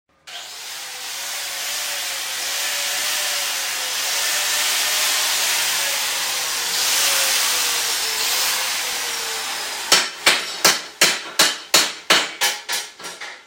Welding and Hammering
Welding of metal along with the hammering of the metal being welded.